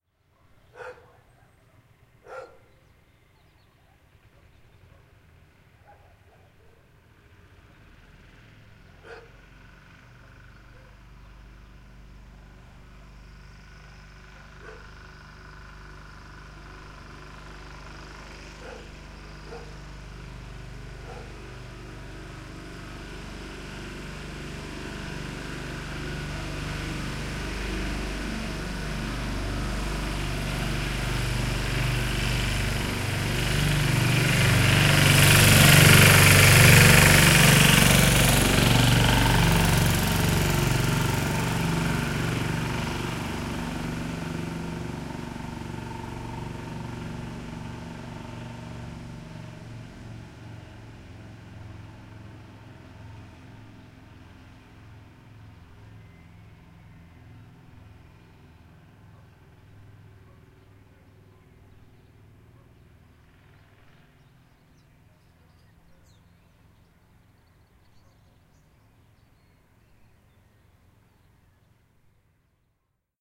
Two-wheel tractor ("chimpín" in galician language) cross in front the micro, producing basic doppler effect.